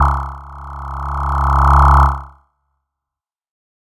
This is the second in a multisapled pack.
It is the note C#. The samples are every semitone for 2 octaves. These can be used as pad with loop points added where you want it to sustain.